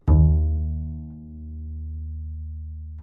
Part of the Good-sounds dataset of monophonic instrumental sounds.
instrument::double bass
note::D#
octave::2
midi note::39
good-sounds-id::8723